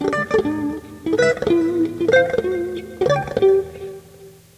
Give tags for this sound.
sweep test arpeggio picking usb guitar doodling

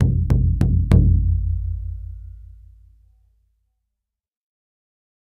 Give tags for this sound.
native drum ethnic first-nations percussion indigenous north-american indian aboriginal hand

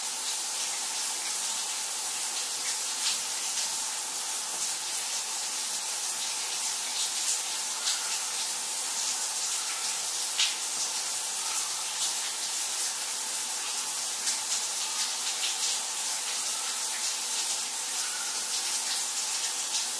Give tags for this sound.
water shower

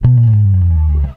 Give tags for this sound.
bass bend down dry electric guitar low short slide string tone

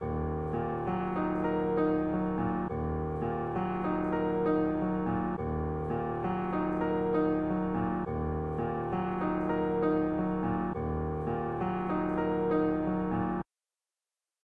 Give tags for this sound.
audio audioportal casts wkwkwk

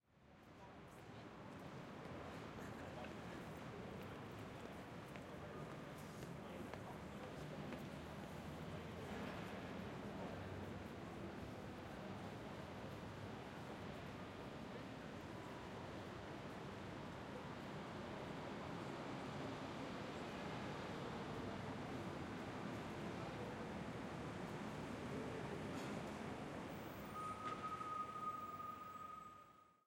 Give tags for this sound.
crowd Zoom walla city traffic field-recording Glasgow street Ambience people H6n

